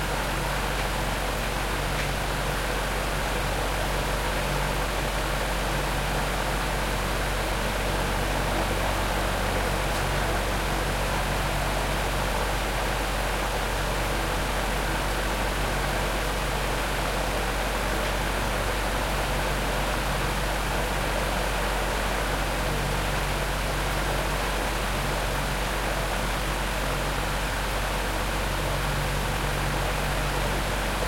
Big Fan
A recording of a fan in a laundry room at night.